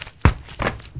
me jumping in front of the microphone inside my silent room.
field-recording, jump